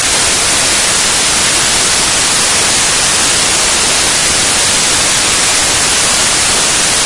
wn looped
simply a digital white noise created thanks to a software's bug.
white, digital, noise